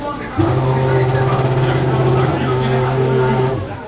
F1 BR 07 EncMot 3
Formula1 GP Brazil 2007.
Engine Starting with broadcasting voice.
Recorded from grandstand B. with ZoomH4, lowgain, stereo mic.
zoom, starting, car, h4, field-recording, pulse-rate, vroom, powerful, racing, engine, f1